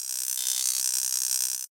ticks@960bpm
a line of ticks running through the stereospectrum
drums, funny, silly